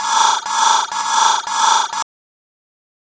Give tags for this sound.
Manipulated
MTC500-M002-s14
Umbrella

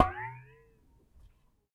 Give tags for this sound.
metal
raw
hit
one-shot
one
metallic
foley
pot
water
sink
top
pitch
kitchen
clean
percussion
pitchy
shot

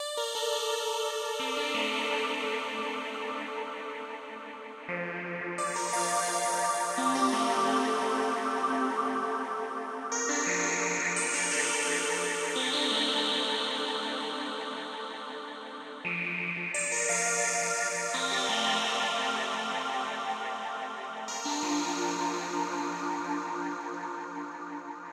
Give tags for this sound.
progression
sci-fi
atmospheric
drum-and-bass
chord
massive
ambient
reverb
techstep
dnb
delay

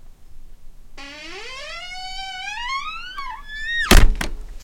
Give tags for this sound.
doors
crackle

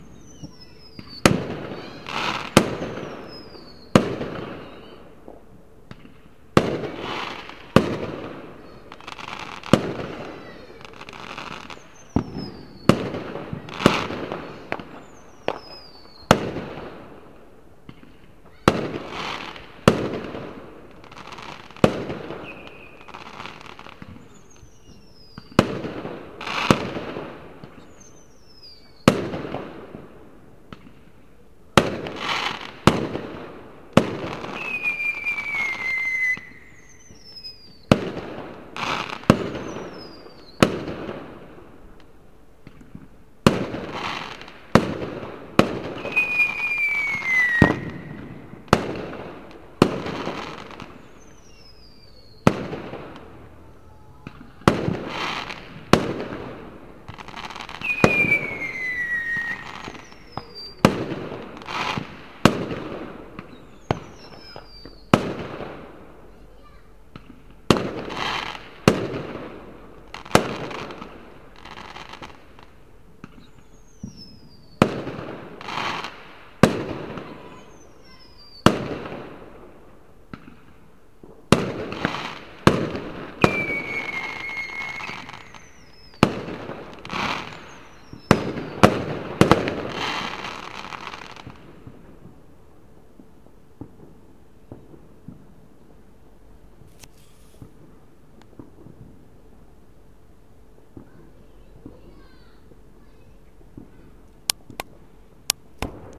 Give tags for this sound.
Fireworks
explosion
explosions
rockets

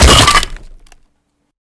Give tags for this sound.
crate
impact

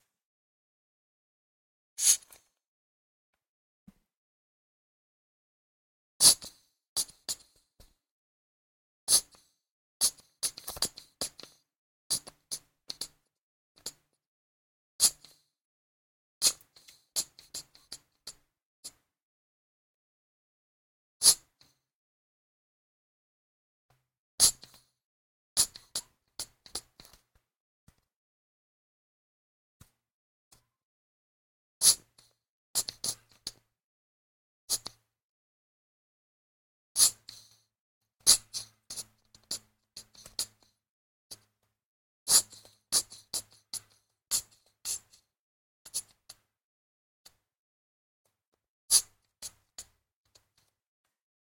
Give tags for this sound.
empty
plastic
sniff
sniffly
squeeze

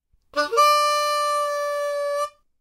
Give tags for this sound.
improvised
Harmonica
music
sample

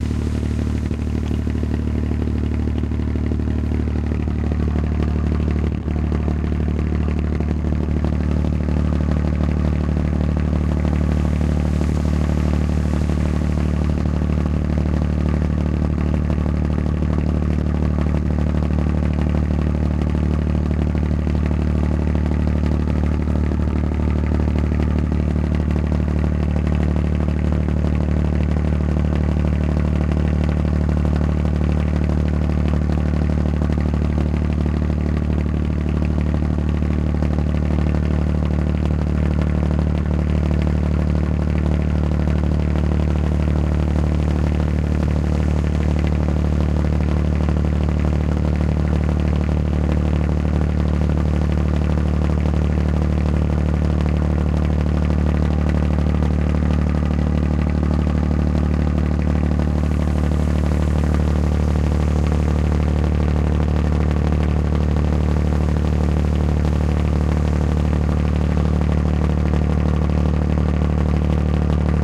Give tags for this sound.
backup
blackout
energy
generator
machine

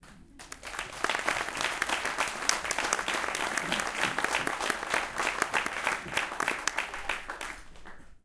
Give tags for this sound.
applause
theater
audience
theatre
people

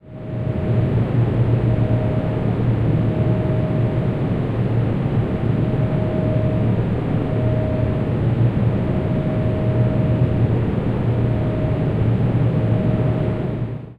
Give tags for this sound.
micron
alesis
synthesizer
interior-ambience
spacecraft

